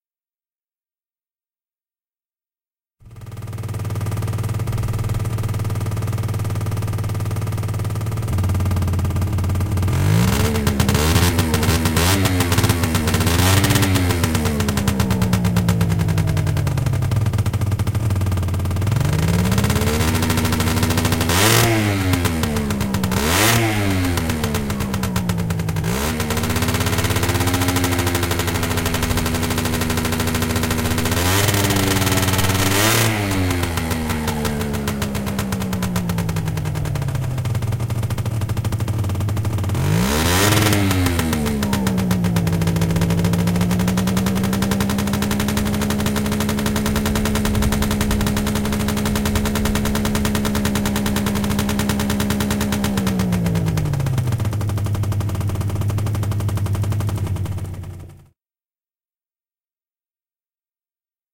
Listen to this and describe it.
Gilera Runner 2007 revs backfire cold idle processed more ambience
Base recording same as other gilera file, but this is with more ambience and deeper sound, this is roughly what you would hear standing close next to it.
gilera, two-stroke, runner